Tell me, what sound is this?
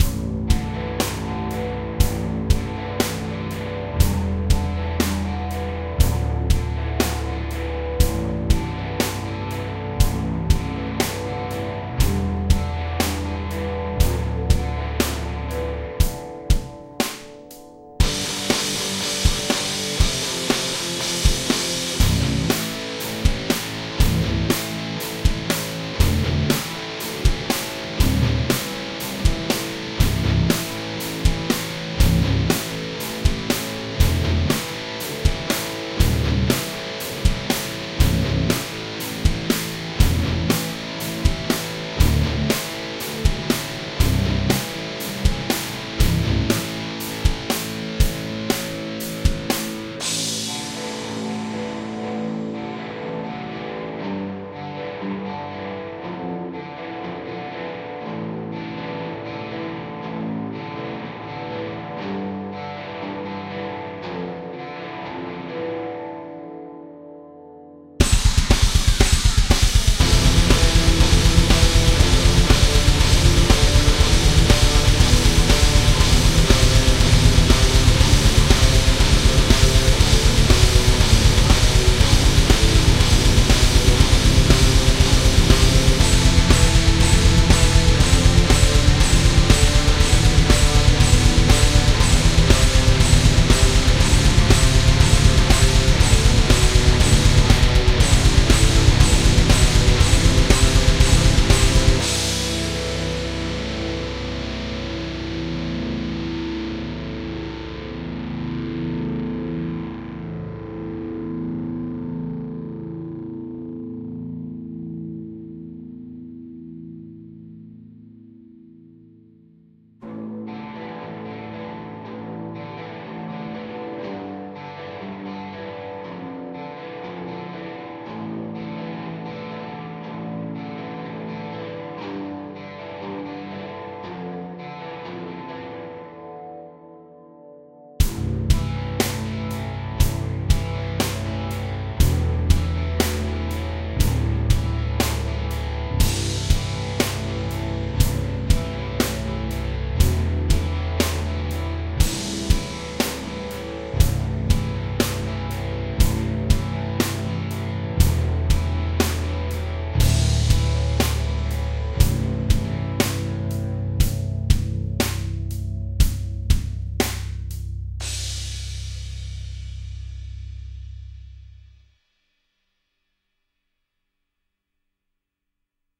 Worthless Scavenger
Guitar, bass & Drums